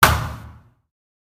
VSH-51-plastic-crack-bright-slam-short
Plastic foley performed with hands. Part of my ‘various hits’ pack - foley on concrete, metal pipes, and plastic surfaced objects in a 10 story stairwell. Recorded on iPhone. Added fades, EQ’s and compression for easy integration.
crack, fist, hand, hit, hits, human, kick, knuckle, plastic, pop, slam, slap, slip, smack, squeak, sweep, thump